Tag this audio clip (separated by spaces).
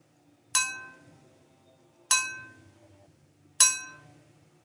Bell Sound Ship